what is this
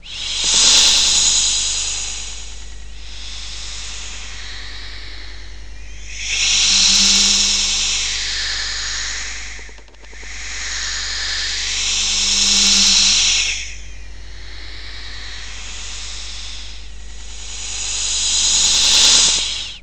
snake-like sound obtained by whistling close to a micro.
beatbox, snake, whistle